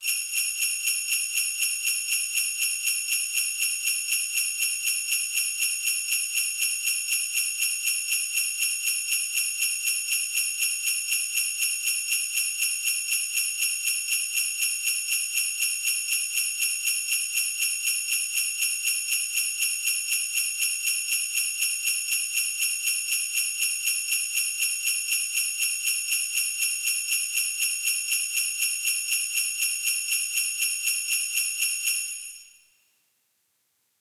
xmas bellis2 120BPM
xmas x-mas merry christmas bell bells carol snow winter holiday holy-night wonderland rudolph jingle chord music loop instrument interlude instrumental stereo trailer effect sfx soundeffect fx noise intro lights santa
Domain, instruments, made, No, Public, required, vst